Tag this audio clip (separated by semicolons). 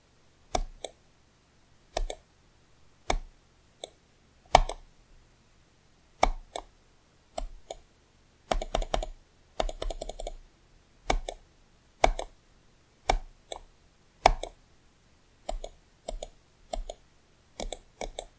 click; clicking; computer; mouse